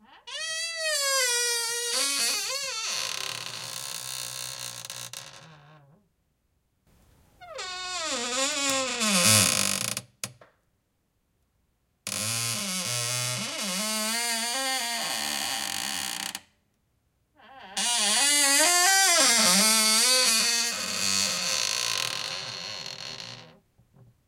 Squeaky door hinge
You'll reach for your can of WD 40 when you hear this creaky door hinge.
squeaky, hinge, door